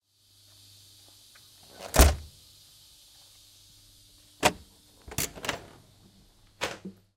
Tilt Train Door Open/Close 1D
Recording of a manual door being opened and closed on a tilt train.
Recorded using the Zoom H6 XY module.
close, closing, door, doors, open, opening, shut, slam, train